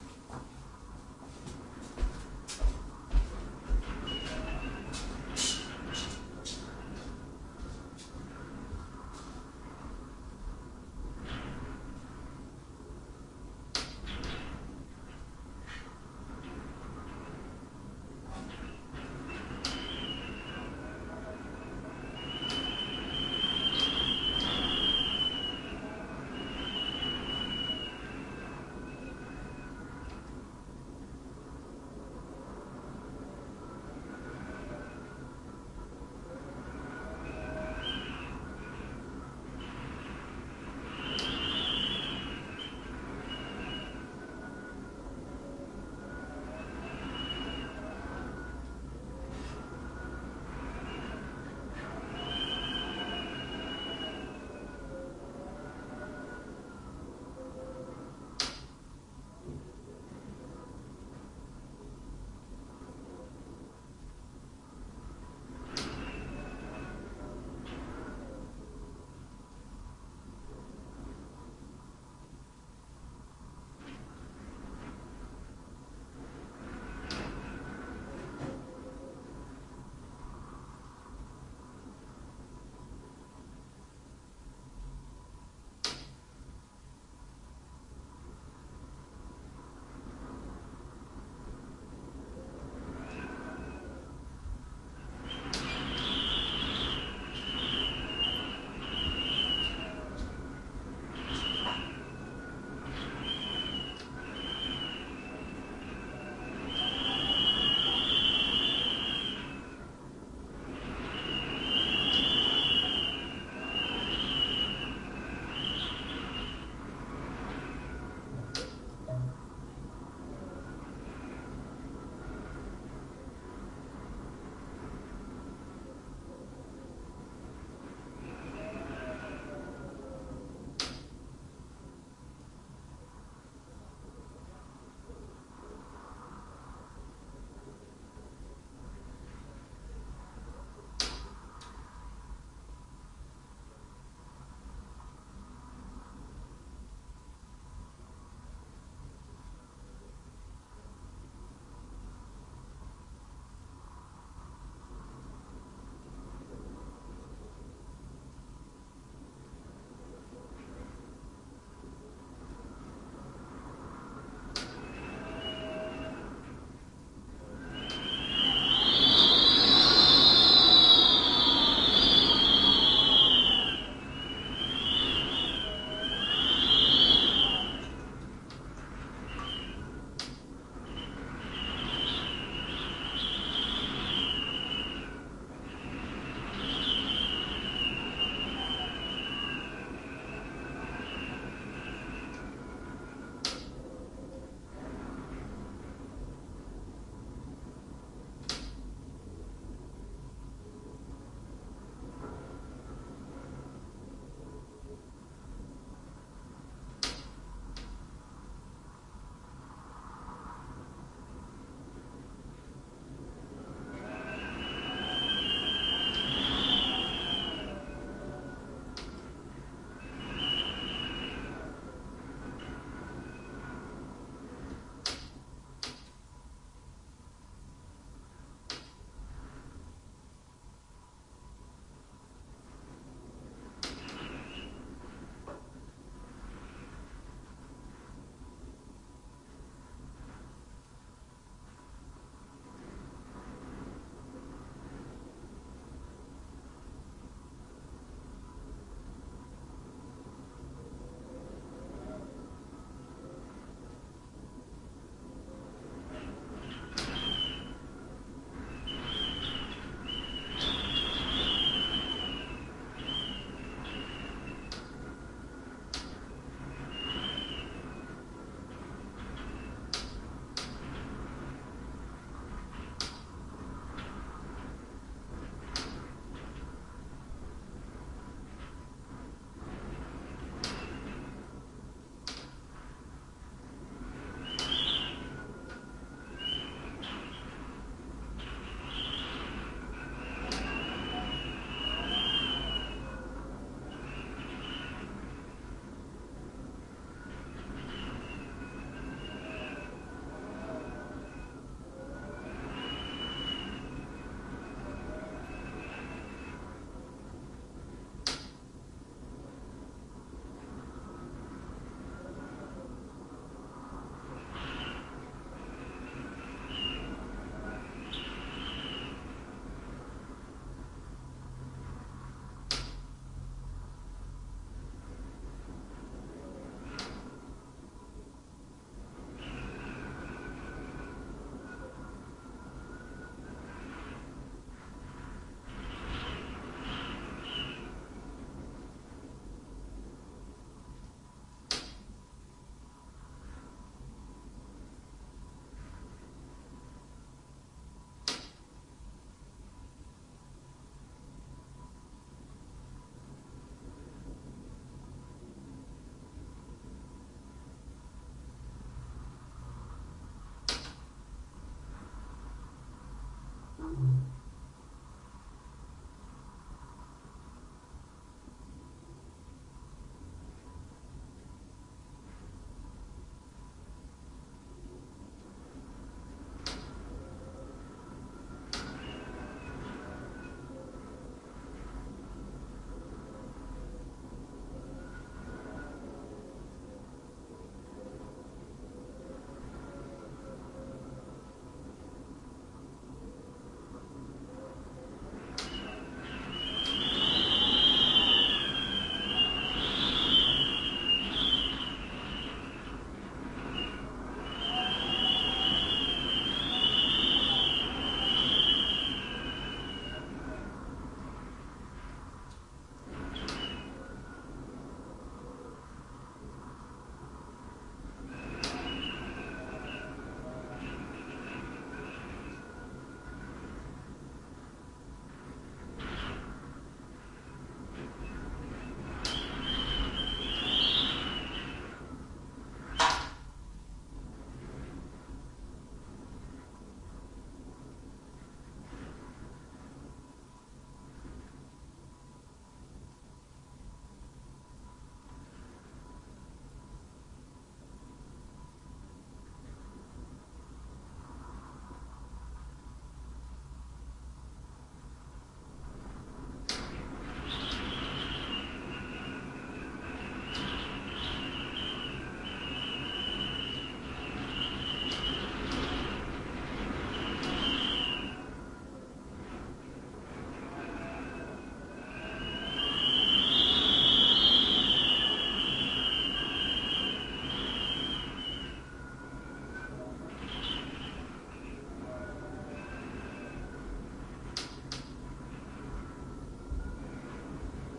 recorded wind in room, not very clean, but I hope u will cut for your use, to fit :)